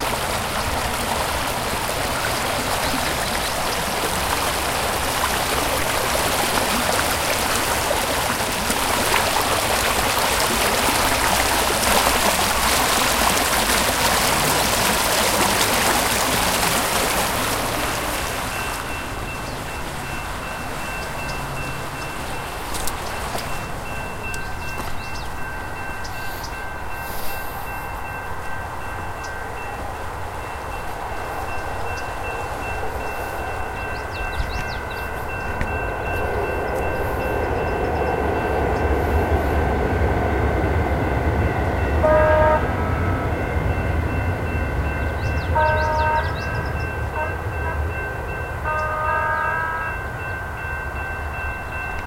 SD trolley
Field, field-recording, nat, recording, train, water